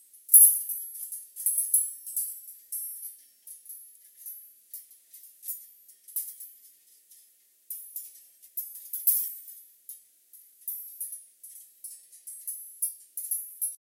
HANGERS1 orig
Clink of wire hangers - original recording
chime, clink, delicate, metal